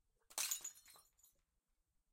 Bottle Smash FF141
1 light, high pitch beer bottle smash, bright, clear sound, hammer, liquid-filled
Bottle-Breaking, light